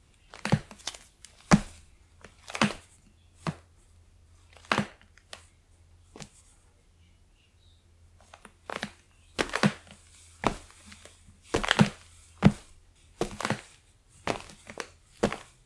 Footsteps on the old wooden floor
I never realized how weird my floor sounds until I recorded this.
The shoewear I used was flipflops.
stairs, cracking, walk, footsteps, feet